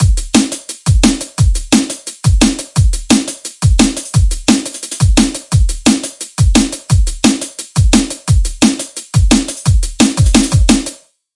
dnb-drums-174-01
drumnbass, 174, drum, 174bpm, bpm, drums, dnb